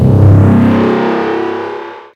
this is made in audacity, from a buildup sound, a pitch, a fade out, a compressor, a equalizer is all to make this alien sound inspired by some sci-fi sounds and movies